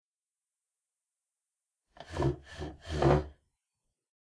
16 prisunuti zidle
Move the chair.
chair, class, classroom, move, prisunout, push, pushing, school, skola, zidle